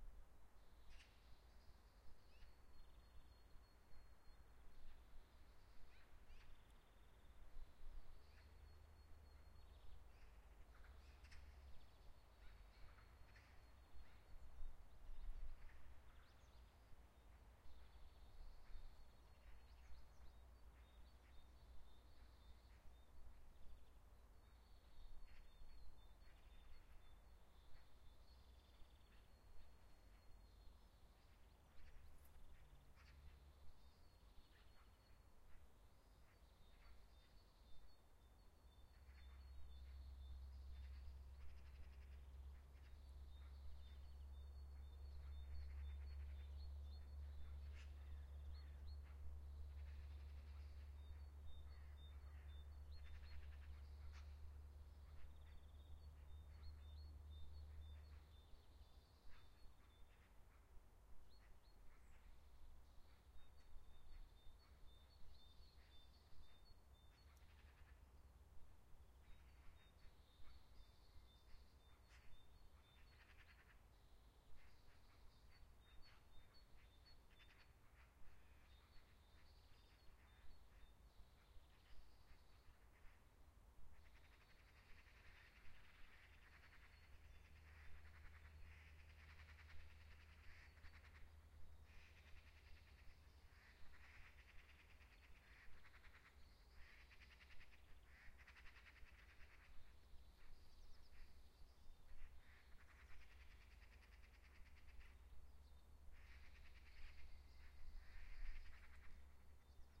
spring ambience stereo
A stereo field recording of nature in a Finnish spring morning ambiance. Light traffic in the background. Recorded with Zoom H1.